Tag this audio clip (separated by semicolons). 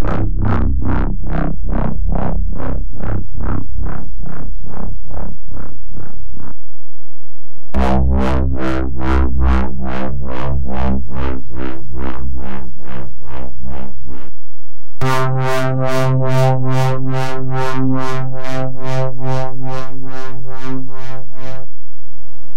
bass; synth; lazerbass